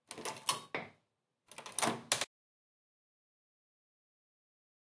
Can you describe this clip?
Manija puerta
casa, acero, puerta